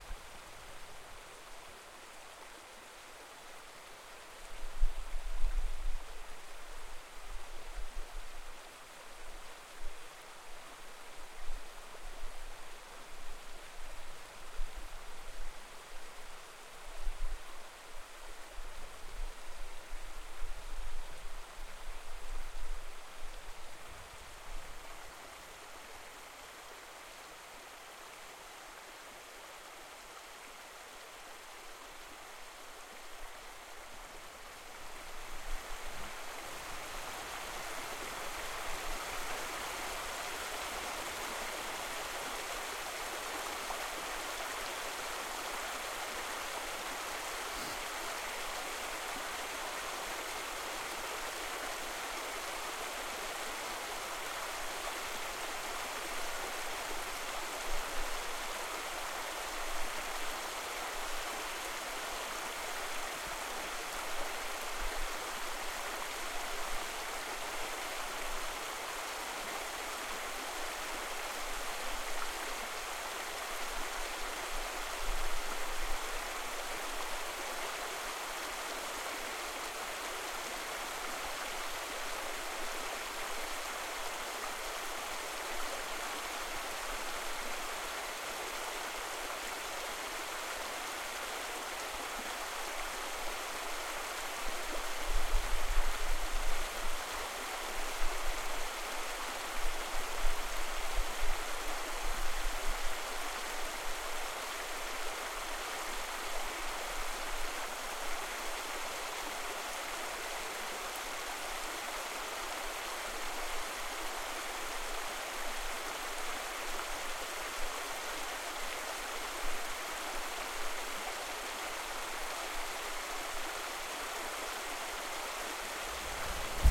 small river
A gentle part of the Merced River in Yosemite Valley. Gain turned up halfway through. A little feedback here and there. Recorded on Zoom H5.
calm, water